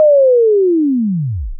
A chirp that slides down. Useful in percussion, I guess.